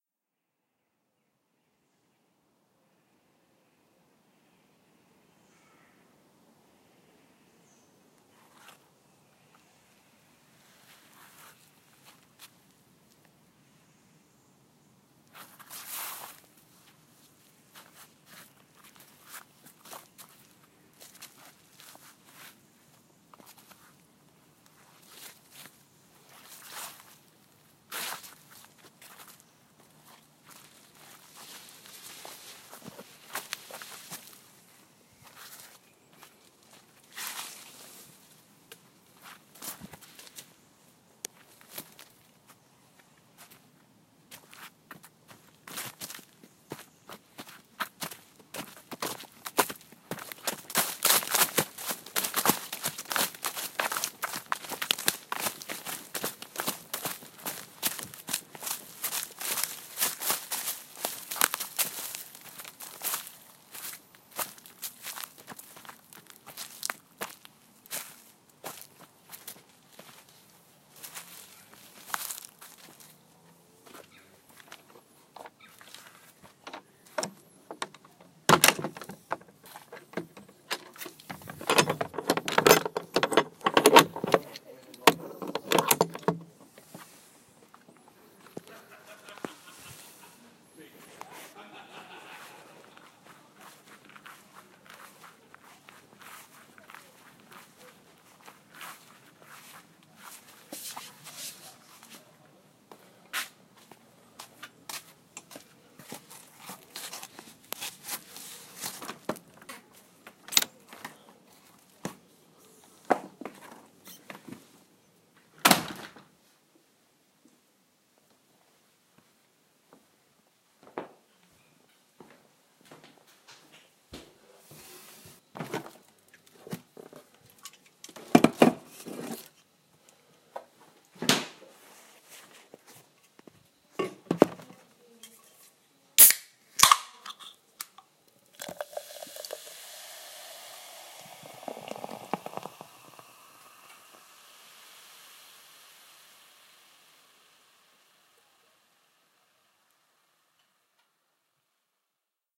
A walk in the woods
Waking up in the woods, something spooked me, so I had to find something to calm me down.
bird, birds, drink, garden, urban, woods